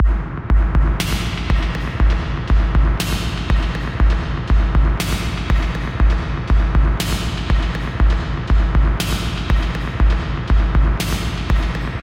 drum with a bunch of reverb